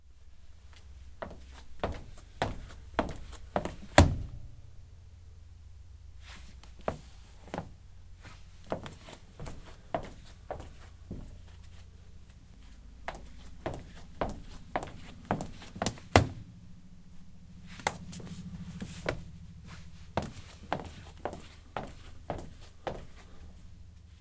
Footsteps - hard heel (Female)
Hard heels on a shiny floor, walking towards and away from the listener
SofT Hear the Quality